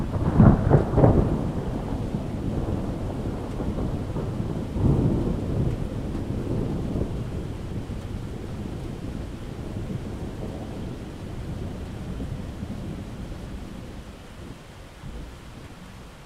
SE Thunder 01
thunder, weather, wgeat, rain